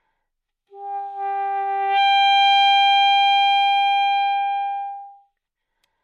Sax Alto - G5 - bad-attack
Part of the Good-sounds dataset of monophonic instrumental sounds.
instrument::sax_alto
note::G
octave::5
midi note::67
good-sounds-id::4729
Intentionally played as an example of bad-attack
G5, alto, good-sounds, multisample, neumann-U87, sax, single-note